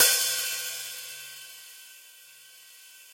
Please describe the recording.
HH13inKZ-BlHO~v15
A 1-shot sample taken of a 13-inch diameter Zildjian K/Z HiHat cymbal pair (K-series top cymbal and Z-Custom bottom cymbal), recorded with an MXL 603 close-mic and two Peavey electret condenser microphones in an XY pair. The files designated "FtSpl", "HO", "SO", and "O" are all 150,000 samples in length, and crossfade-looped with the loop range [100,000...149,999]. Just enable looping, set the sample player's sustain parameter to 0% and use the decay and/or release parameter to fade the cymbals out to taste. A MIDI continuous-control number can be designated to modulate Amplitude Envelope Decay and/or Release parameters, as well as selection of the MIDI key to be triggered, corresponding to the strike zone/openness level of the instrument in appropriate hardware or software devices.
Notes for samples in this pack:
Playing style:
Cymbal strike types:
Bl = Bell Strike
Bw = Bow Strike
E = Edge Strike
FtChk = Foot "Chick" sound (Pedal closes the cymbals and remains closed)
cymbal, velocity, multisample, 1-shot, hi-hat